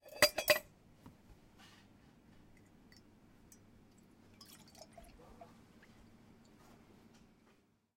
Pouring milk in a metallic jug.
Microphone: Zoom H4N Pro in XY 90° set-up.
milk, liquid, pouring